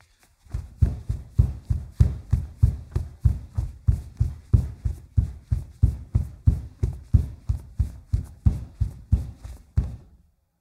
01-35 Footsteps, Wood, Socks, Running 2
Footsteps, running on wood floor with socks
fast, footsteps, hardwood, running, socks, wood